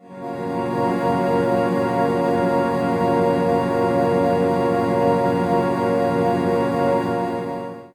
Really cool smooth pad synth
cool, pad, smooth, synth